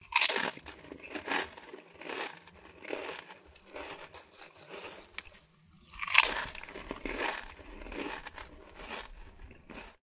Eating Chips MUS 152
chips, crunch, eating, bite, munch, snack, mus152, food, eating-chips